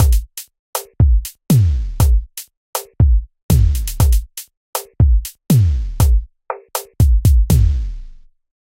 SlowZouk2 60 BPM
Slow zouk drum beat loop